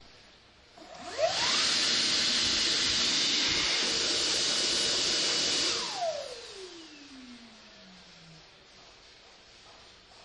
A recording of a super fast hand dryer at a service station in the UK.